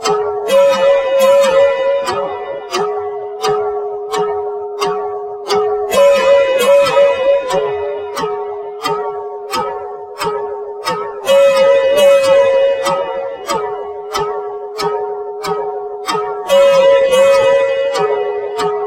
I have some ideas of making some new AND useful sounds, all based on latex local Micro resonance.
development, sounds, new